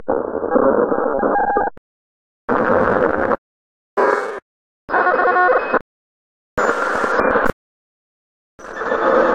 Glitch Elements
If a computer is blowing up by itself or a robot has got a malfunction, then this sound can help you to create the right atmosphere.
It comes with different parts in the mix that can help editors and sound designers to obtain the right tone which they were looking for.
Enjoy
futuristic, glitch, distortion, electronic, fi, Hi-Tech, sci-fi, design, electric, fx, future, effect, sci, digital, Computer, freaky, lo-fi, weird, strange, soundeffect, digital-distortion, robot, abstract